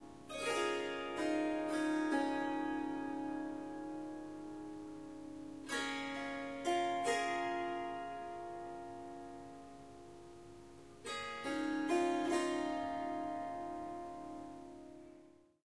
Harp Melody 6
Melodic Snippets from recordings of me playing the Swar SanGam. This wonderful instrument is a combination of the Swarmandal and the Tanpura. 15 harp strings and 4 Drone/Bass strings.
In these recordings I am only using the Swarmandal (Harp) part.
It is tuned to C sharp, but I have dropped the fourth note (F sharp) out of the scale.
There are four packs with lots of recordings in them; strums, plucks, short improvisations.
"Short melodic statements" are 1-2 bars. "Riffs" are 2-4 bars. "Melodies" are about 30 seconds and "Runs and Flutters" is experimenting with running up and down the strings. There is recording of tuning up the Swarmandal in the melodies pack.
Ethnic, Swarsamgam, Strings, Swar-samgam, Surmandal, Indian, Melody, Riff, Harp, Melodic, Swarmandal